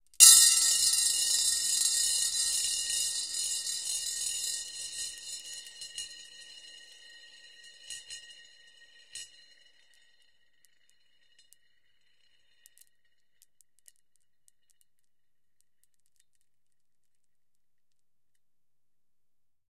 marbles - rolling around 33cm ceramic bowl - 5 ~13mm marbles 03
Rolling five ~13mm marbles around a 33cm diameter ceramic bowl.
ceramic, rolled, bowl, roll, glass, marble, ceramic-bowl, rolling